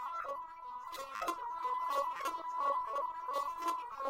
Edited from goose. It sounds like a dying robot :(
Electronic, Sci-fi